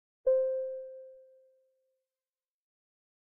Elevator Bell
ping, ring, bing, ding, Bell, bong, Elevator